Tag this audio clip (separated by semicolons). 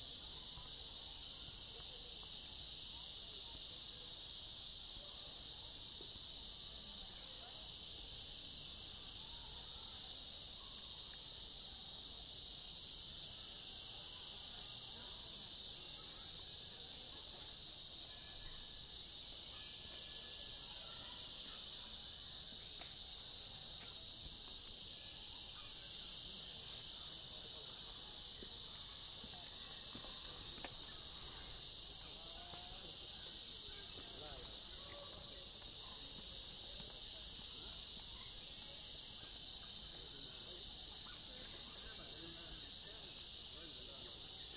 Field-recording; Darjeeling; cicadas; voices; India; ambience; people; countryside; West-Bengal